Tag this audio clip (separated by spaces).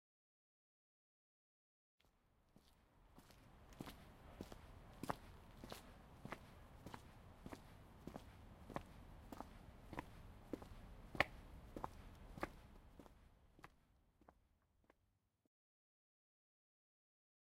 CZ Panska Czech